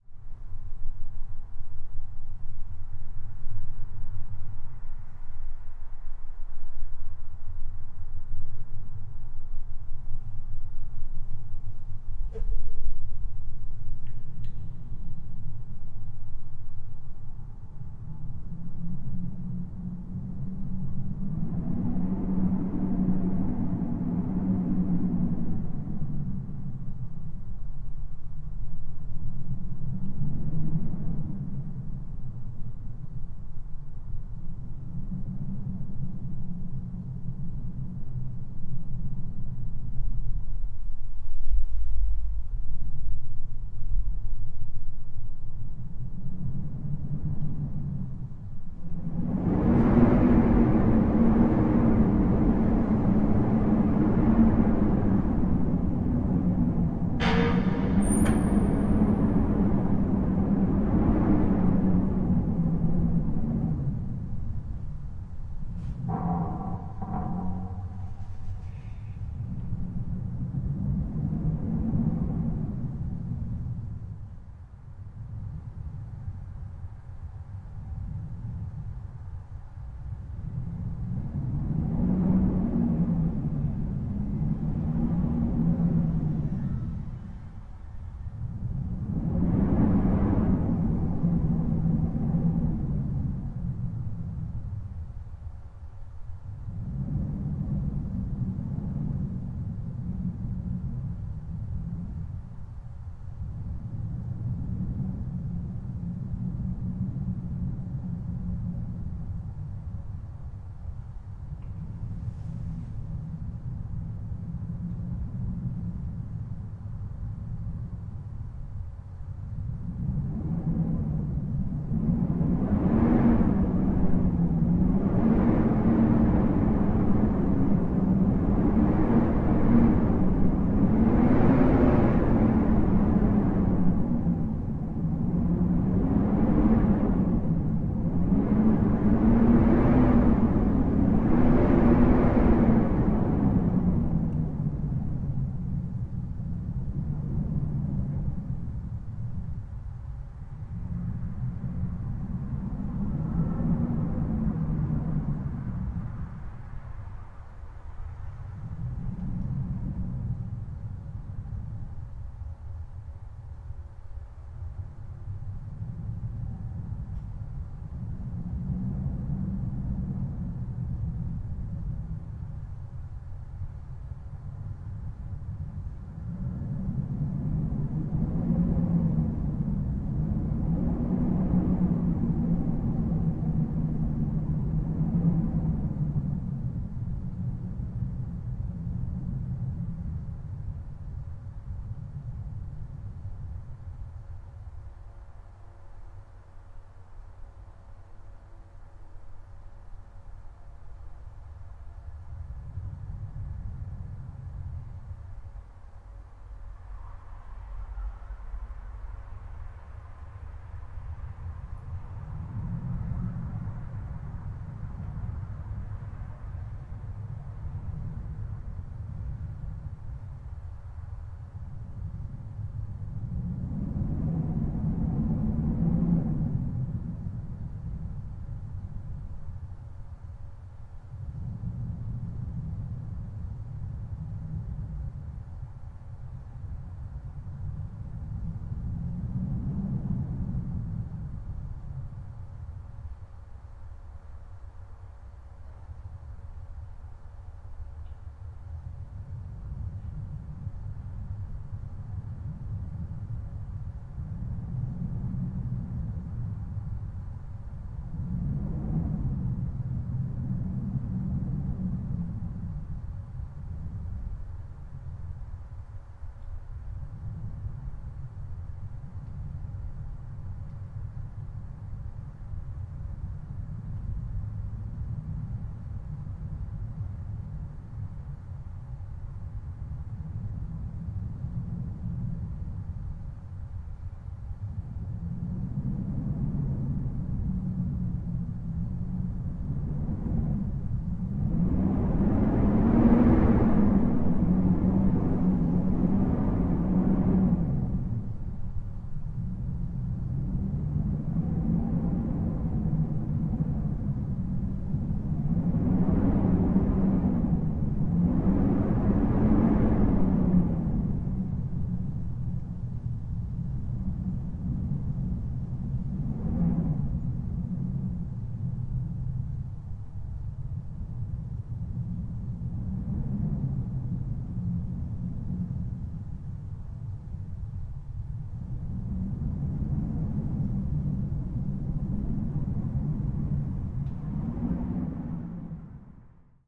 mystic wind howling
a strong wind was blowing through the window and under the door. this created a mystic and weird sound. I added some reverb to it and now its a huge horror cave, where the wind is creating a frightening atmosphere
castle, cave, haunted, horror, horror-fx, howling, mystic, scary, spooky, wind